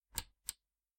Button Click 04
The click of a small button being pressed and released.
The button belongs to a tape cassette player.
Click, Button, Machine, Casette, Player, Tape, Release, Press